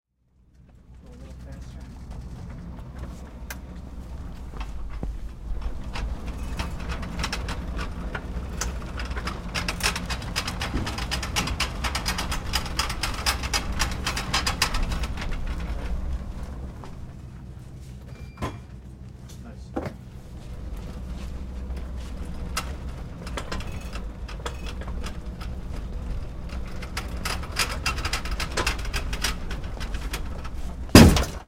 Rolling Hospital Bed
Rolling a hospital bed on an empty wing of Mount Sinai Beth Israel Hospital
bed, electronic, hallway, Hospital, rolling